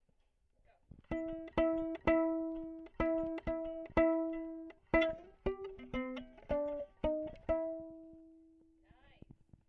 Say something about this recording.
Ukulele Jingle
A contact microphone recording a ukulele playing jingle bells